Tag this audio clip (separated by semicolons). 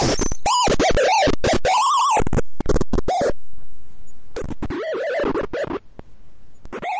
digital; harsh; neural-network; random; glitch; lo-fi; noise